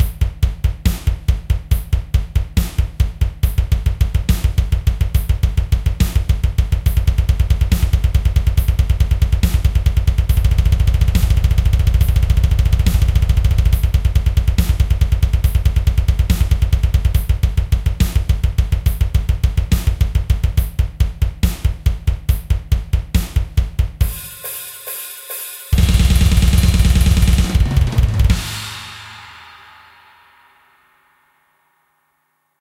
some drum samples i did according to one of george kollias' exercises
drum, blastbeat, ezdrummer, drums, george-kollias, double-bass, samples